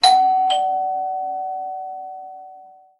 door bell short
Short edit of my doorbell recording with less decay
See the rest of the samples in my doorbell sound pack
Recorded with a 5th-gen iPod touch. Edited with Audacity.
dong, ding, ding-dong, chime, doorbell, bell, door-bell, door